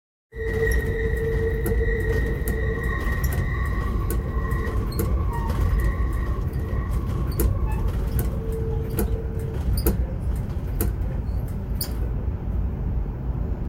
Recorded on a train while going through a windy mountain pass